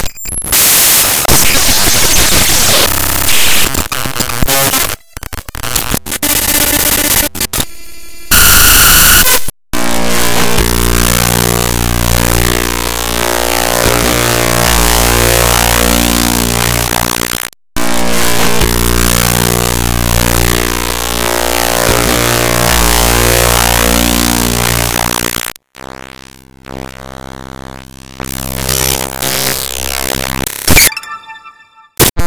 created by importing raw data into sony sound forge and then re-exporting as an audio file.
clicks, data, glitches, harsh, raw